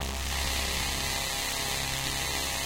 90 Nuclear Atomik Pad 03
standard lofi hiphop pad
atomic, free, hiphop, lofi